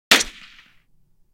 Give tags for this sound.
shot; military; war; wwii; mp-40; gun; rifle

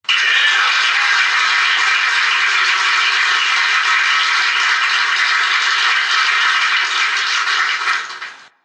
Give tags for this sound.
cheers; crowd; applaud; cheer; clapping; auditorium; clap; theatre; polite; audience; cheering; foley; applause; claps; applauding; hand-clapping

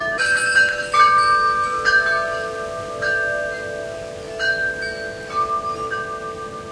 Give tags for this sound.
instrumental; wind; music; tinkle; chimes; bells

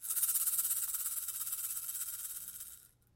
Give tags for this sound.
animal; cascavel; cobra; natureza

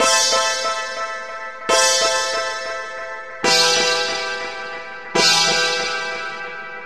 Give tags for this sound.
roots; DuB; reggae; onedrop; rasta; Jungle; HiM

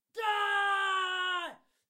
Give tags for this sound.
english; Cry; Male; vocals; Scream; Battle; Shout; voice

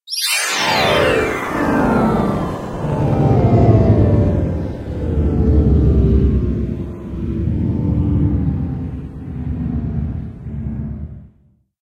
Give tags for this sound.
pad; LSD; pill; drug; 3D; trip; shift; drugs; dizzy; altering; dream; morph; sequence; trance; phase

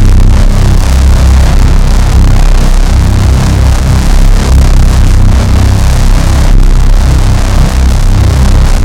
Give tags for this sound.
bass; driven; drum-n-bass; harsh; heavy; reece

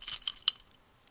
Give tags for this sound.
chain; key; noise

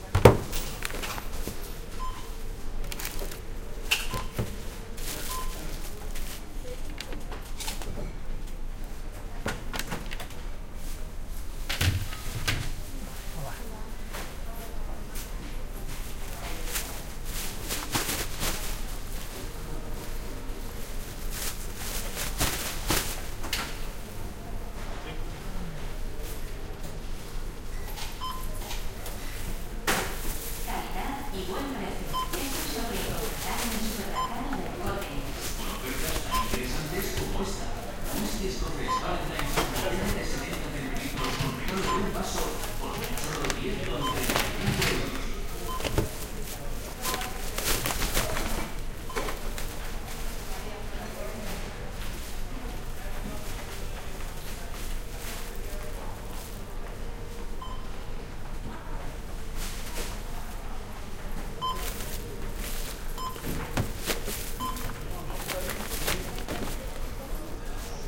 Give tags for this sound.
ambiance; cashdesk; city; field-recording; shopping

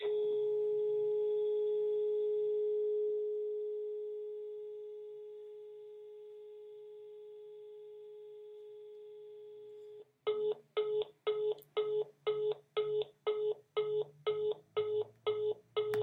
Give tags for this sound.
en; espera; fono; line; phone; sonido; sound; tel; waiting

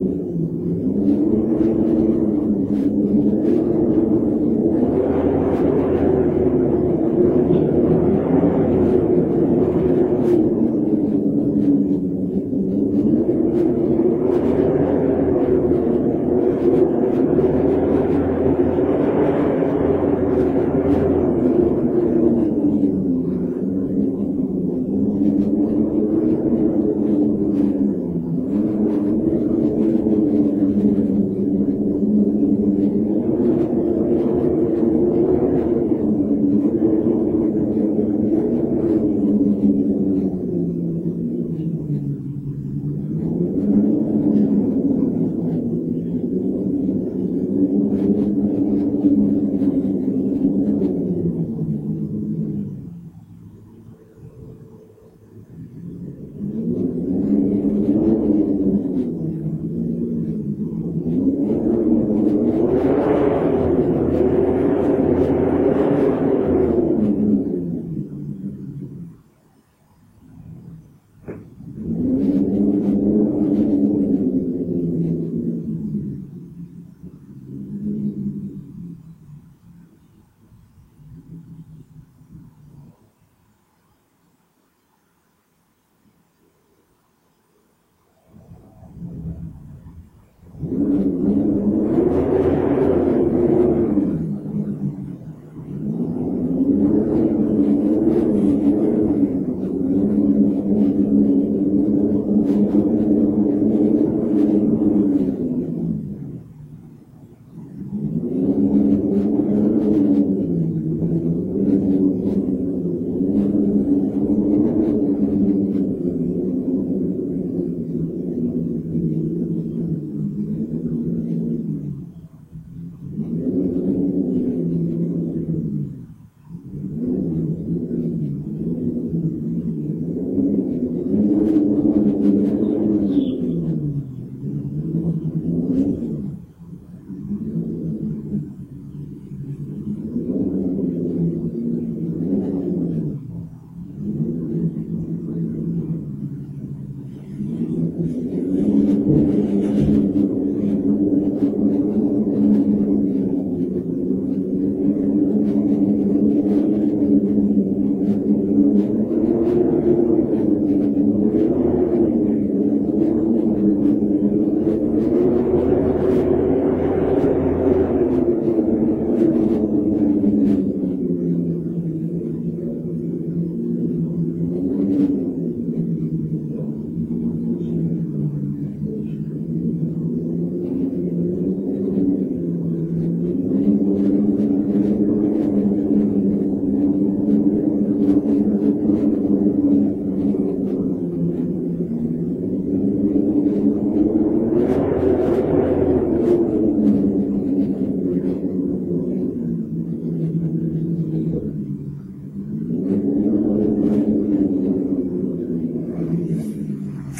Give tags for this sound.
storm; blowing; howling; door; windy; wind

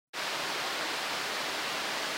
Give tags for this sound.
Salmon; Baranof; Island; Alaska; Stream

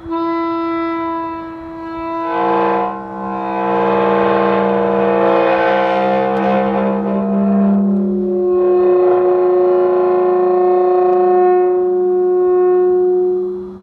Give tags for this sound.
creak; gate; groan; hinges; iron; metal; moan

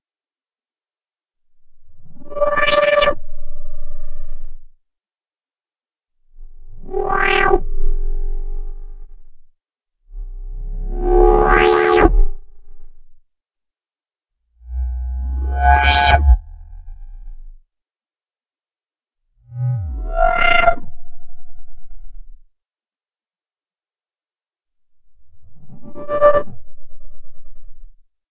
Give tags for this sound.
bleep; clavia; distorted; evolving; fx; harsh; modular; nord; screech; slow; synth; texture; wah

